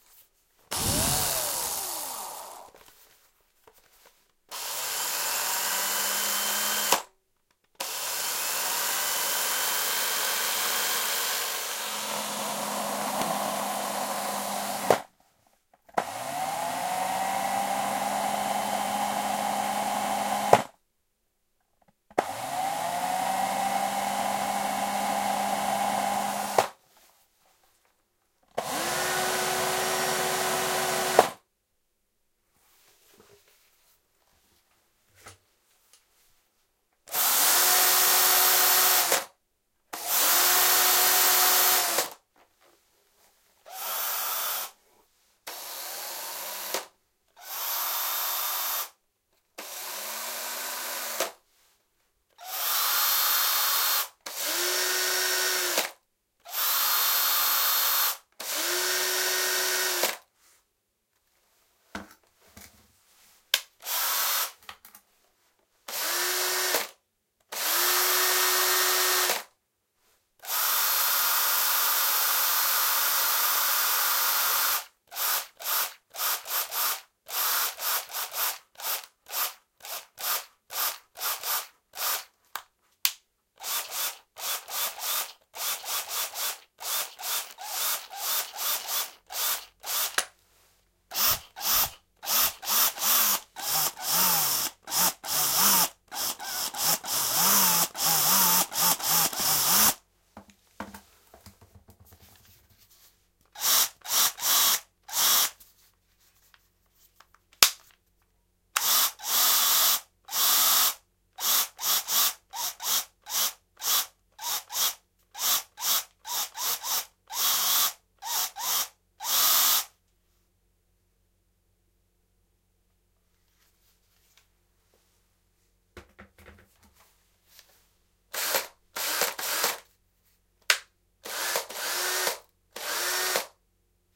electric screwdriver ST
electric screwdriver various speeds
screwdriver, robotic, mechanical, machine, drill, machinery, tools, robot